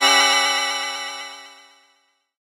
PPG 008 Dissonant Space Organ C3
This sample is part of the "PPG
MULTISAMPLE 008 Dissonant Space Organ" sample pack. A short dissonant
chord with a sound that is similar to that or an organ. In the sample
pack there are 16 samples evenly spread across 5 octaves (C1 till C6).
The note in the sample name (C, E or G#) does not indicate the pitch of
the sound but the key on my keyboard. The sound was created on the PPG VSTi. After that normalising and fades where applied within Cubase SX.
ppg, multisample, chord, organ, dissonant